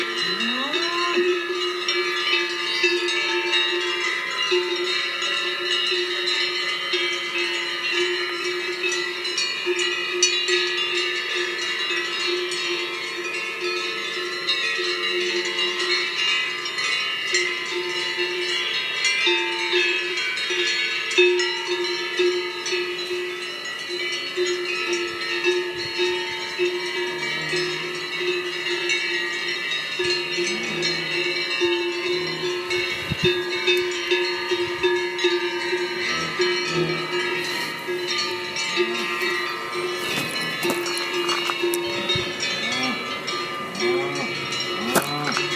Cows grazing in the pasture, June 2017, Auvergne, France
cowbells, Field-recording